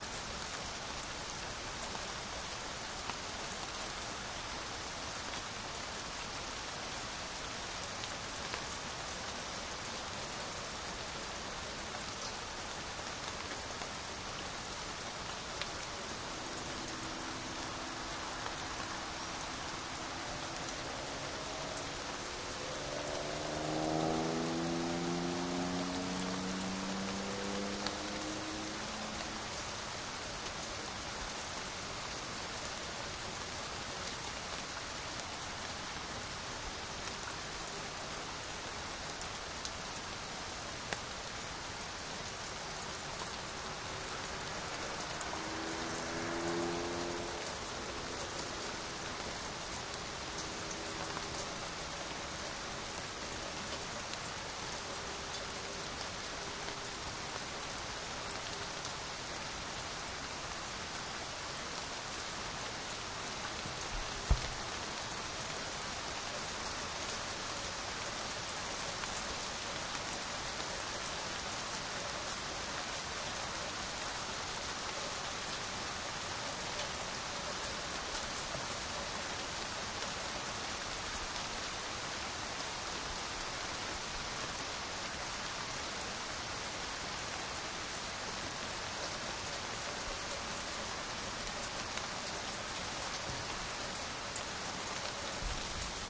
Summer rain recorded in July, Norway. Distant car at approx 00:20. Tascam DR-100.
field-recording, weather